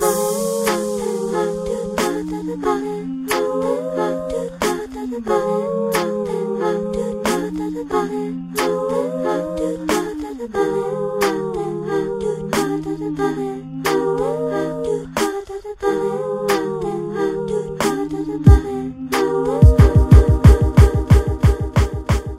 Loop NatureGoddess 02
A music loop to be used in storydriven and reflective games with puzzle and philosophical elements.
video-game, game, loop, gaming, sfx, indiegamedev, videogames, music-loop, indiedev, music, Philosophical, Thoughtful, gamedev, videogame, Puzzle, games, gamedeveloping